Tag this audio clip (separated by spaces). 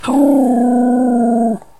animal dog growl poodle